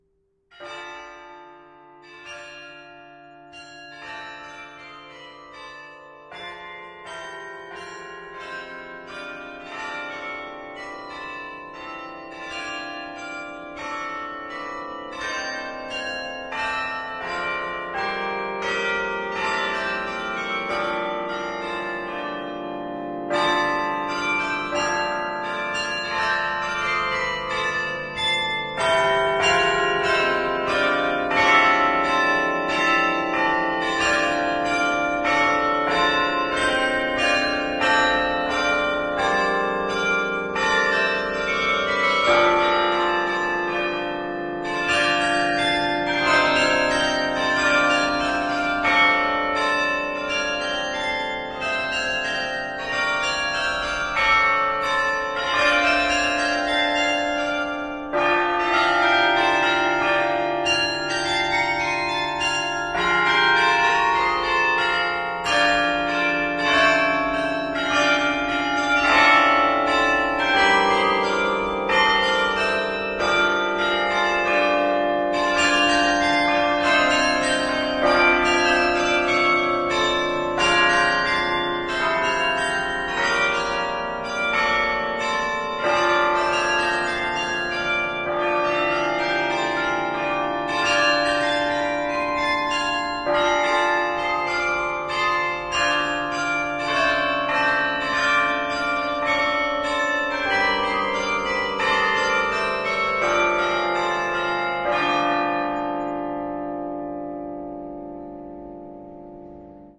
Carillon Gorinchem The Netherlands